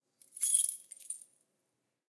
Keys Handling 4
The enjoyable and satisfying clinking symphony of handling keys on a ring
Any credit is more than welcome.
clink, drop, foley, handling, jingle, jingling, key, keychain, keyfumble, keyinsert, keylock, keyunlock, scrape, sfx, soundeffects, turn